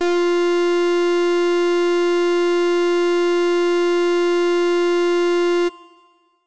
Full Brass F4
The note F in octave 4. An FM synth brass patch created in AudioSauna.